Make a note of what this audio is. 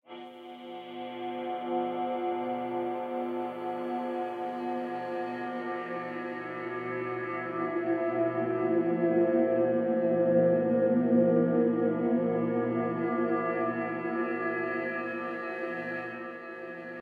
ab celler atmos
a haunting layered cello
ambient, atmospheres, drone, evolving, experimental, freaky, horror, pad, sound, soundscape